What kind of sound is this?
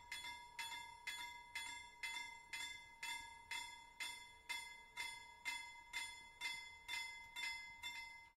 A railroad crossing in Sweden.Recorded with a Zoom H5 with a XYH-5 stereo mic.
crossing, level, rail, railroad, railway, tracks, train